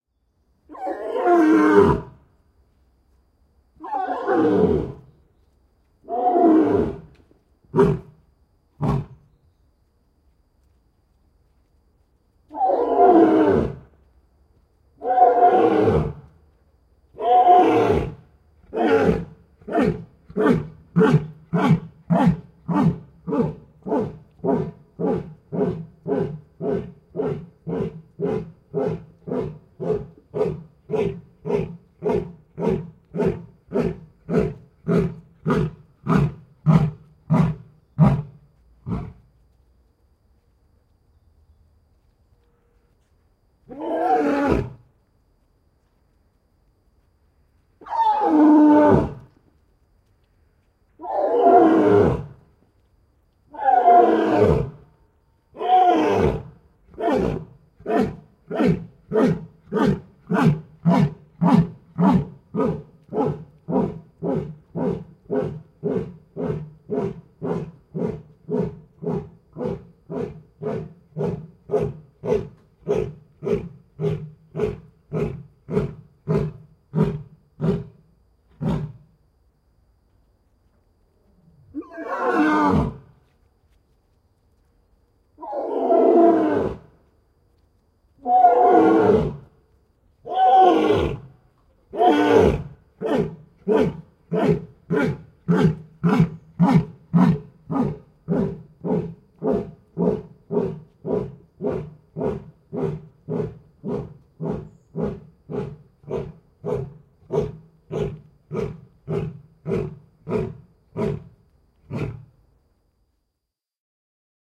Leijona karjuu ja tuhahtelee eläintarhan ulkohäkissä. (Panthera leo).
Paikka/Place: Suomi / Finland / Helsinki, Korkeasaari (eläintarha, zoo)
Aika/Date: 26.11.1995